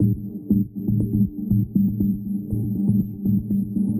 120 Chopped proposal
A chopped pad sound with some further treats
120-bpm, bassy, chopped, drum, loop, lpf, processed, rhythm